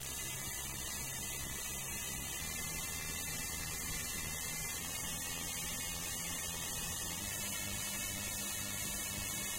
100 Hot Rain Synth 01
dirty, bit, digital, synth, crushed